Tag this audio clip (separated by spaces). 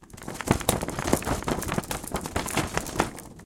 Essen Germany January2013 SonicSnaps